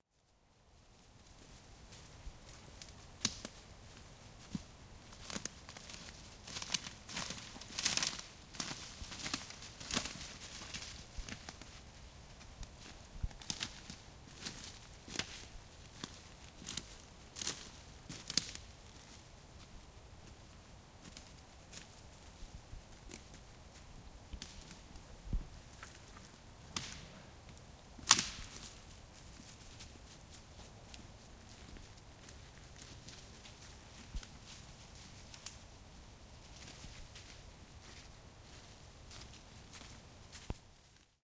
a stereo recording in a forest in the Netherlands. A girl is walking around the microphone.